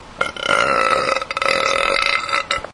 A nice little burp for you to use.
burp, gas, vapors